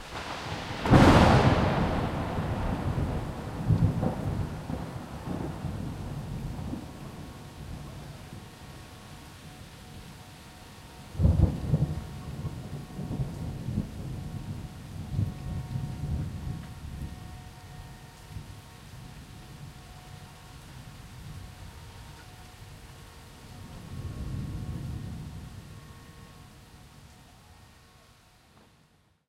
NYC Rain 4 C- lightning cracks with traffic
NYC Rain Storm; Traffic noise in background. Rain on street, plants, exterior home. Thunder Rumble throughout.
NYC, Rain, Storm, Thunder, Traffic, Weather